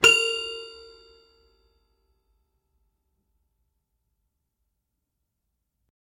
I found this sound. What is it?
A single note played on a Srhoenhut My First Piano. The sample name will let you know the note being played. Recorded with a Sennheiser 8060 into a modified Marantz PMD661.